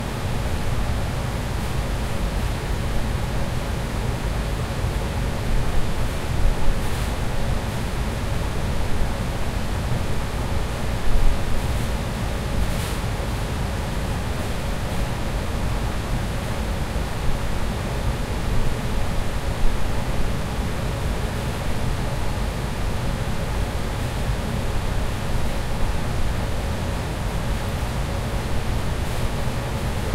Computer data center
Sound of computers in a data center.
Recorded with a Zoom H4N, edited with Audacity under Ubuntu Debian Gnu Linux.
computer, fan